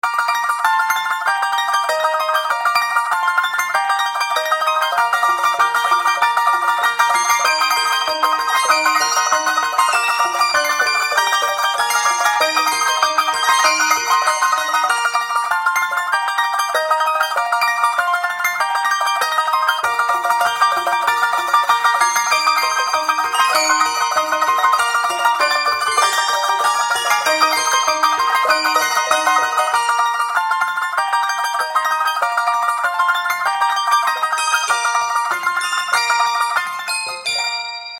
fast ukulele
A ukulele warped into a fast tune.Hope you like it!